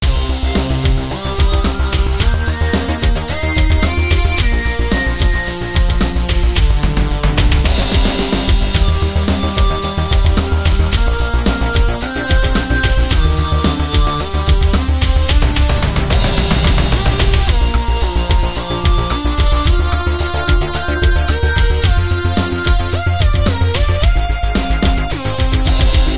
There is Hope
110, Bass, BPM, C-minor, Drums, Electronic, Hope, Hopefull, Loop, Music, Synth